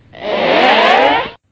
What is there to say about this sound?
Ehh (Walla)
Just a random walla sound I did since I can't find a right reaction sound of audiences in the internet.
Like in my most walla sounds, I recorded my voice doing different kinds of "ehhs" and edited them out in Audacity.
:D
disbelief, walla, crowd, sitcom, surprised, ehh, audience